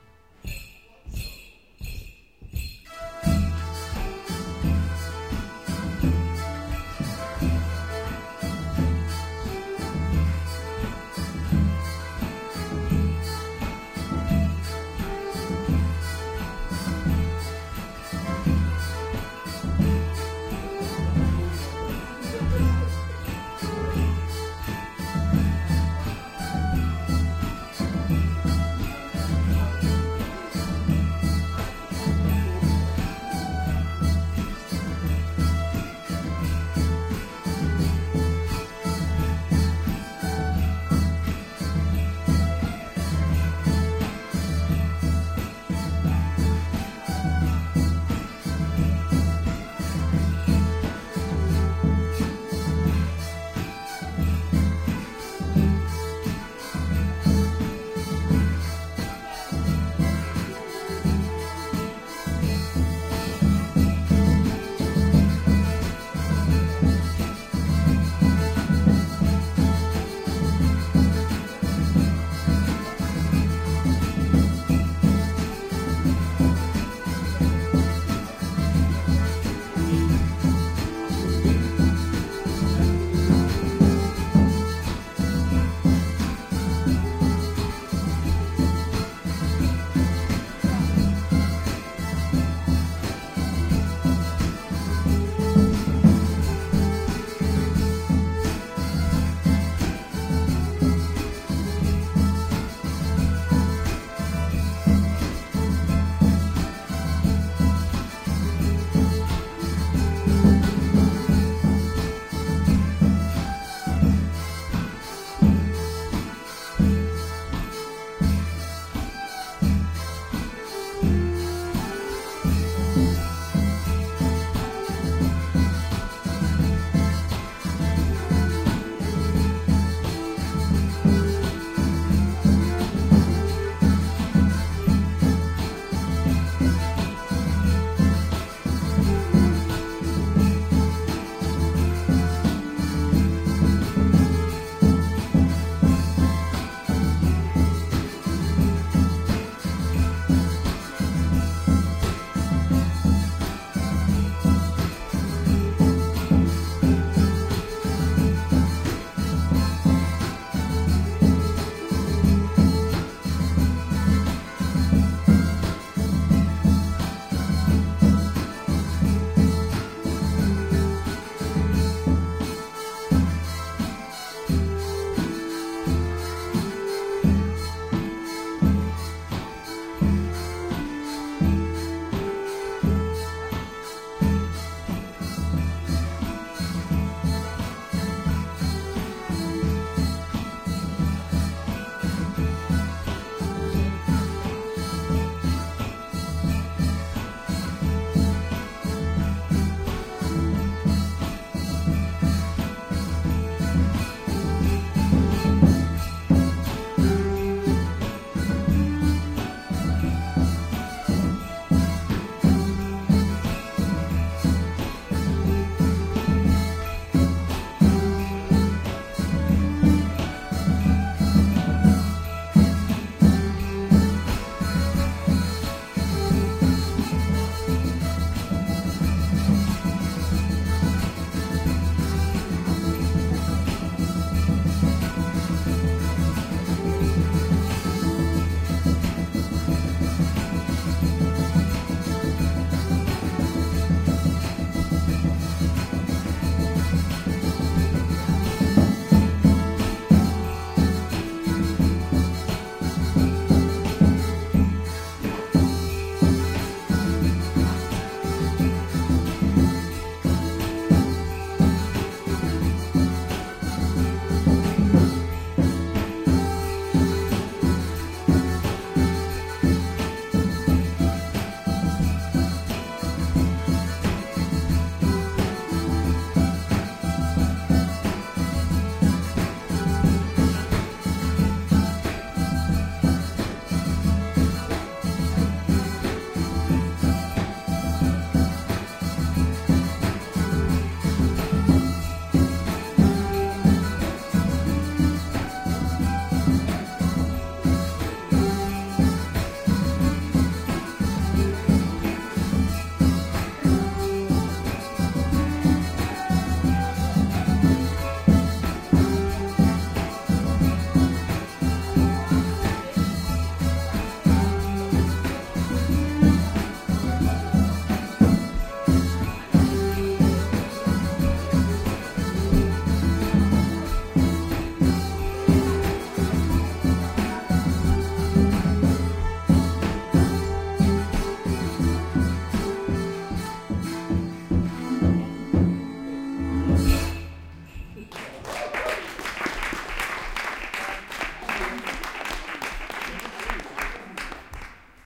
Music from the middle ages 07 2013-08-10 Douce Dame Jolie
This piece is called "Douce Dame Jolie"
This was recorded a fine Saturday in august, at the local viking market in Bork, Denmark. Three musicians played a little concert inside the viking church. Unfortunately i have no setlist, so i can't name the music.
Recorded with an Olympic LS-100 portable recorder, with internal mics.
Please enjoy!
bagpipe, band, bork, concert, denmark, Douce-Dame-Jolie, drum, drums, entertainment, fedel, fiddle, field-recording, gige, gigue, historical, history, hurdy-gurdy, instrumental, internal-microphone, jutland, medieval, middle-age, middle-ages, music, musicians, olympus-ls100